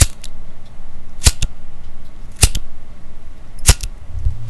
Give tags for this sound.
Bic; Flick; Lighter

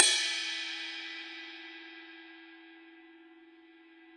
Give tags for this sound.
1-shot; cymbal; multisample; velocity

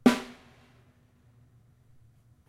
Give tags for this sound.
kit; snare; drum

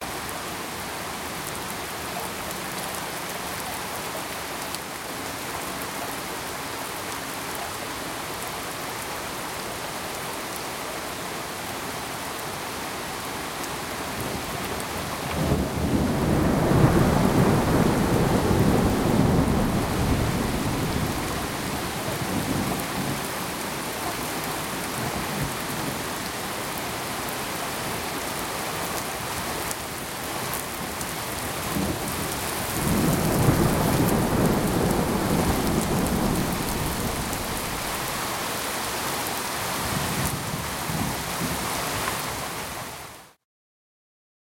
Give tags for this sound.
mobile; UEM; ring; cell; phone; call; alert; message; cellphone

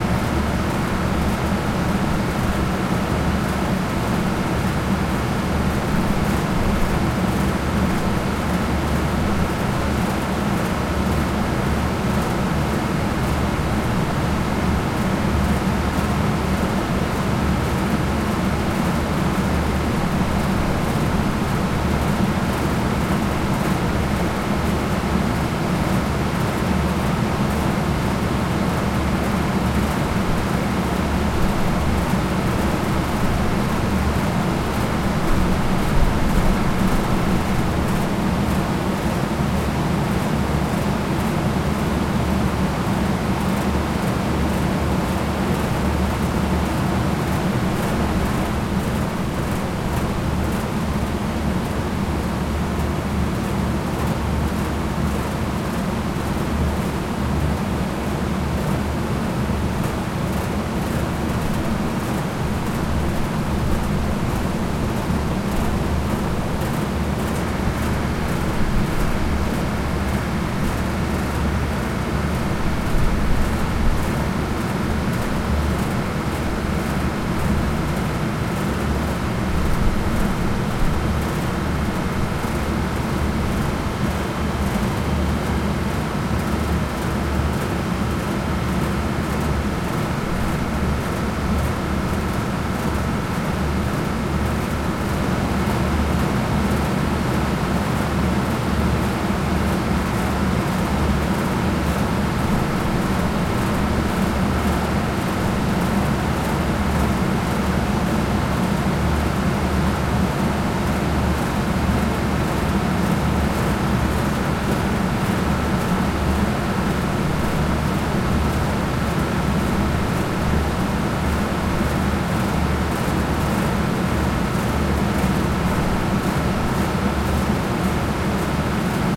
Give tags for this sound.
air-conditioning; ambience; ambient; drone; factory; fan; field-recording; hum; machine; machinery; mechanical; noise; outdoor; stereo; zoom-h5

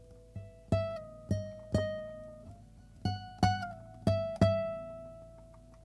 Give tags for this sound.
acoustic; guitar; soft

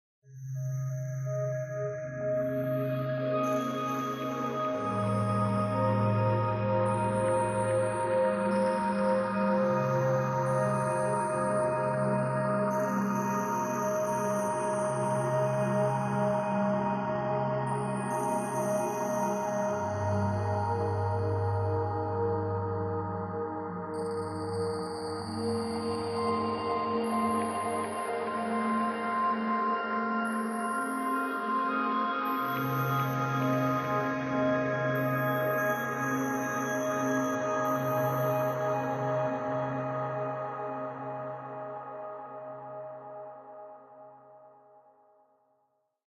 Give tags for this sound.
ambient; drone; ghosts; quiet; relaxing; spacey; spectral

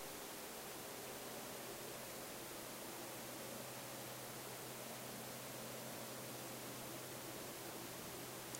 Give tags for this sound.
island; sound; ambient

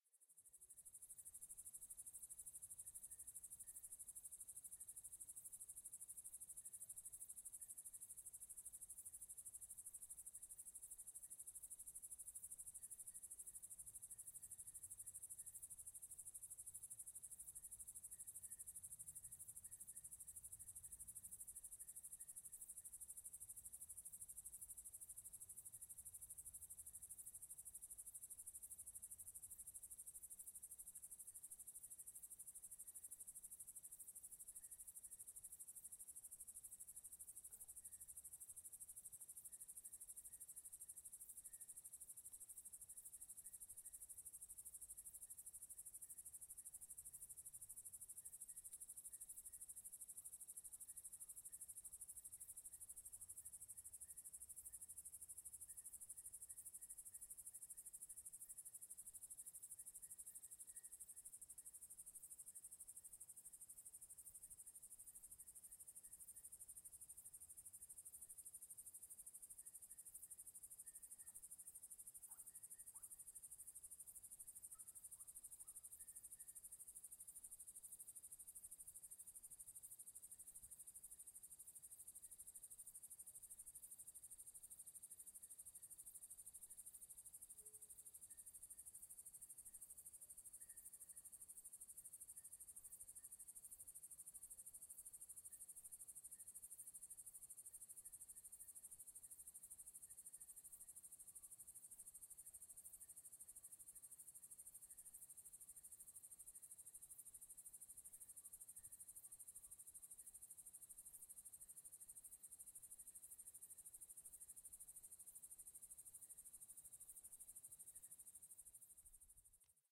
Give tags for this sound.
owl; goat; field-recording; birds; insects; outdoor; Italy; crickets; nature; summer; cicade; ambience; wildlife; night; camping; ambiance

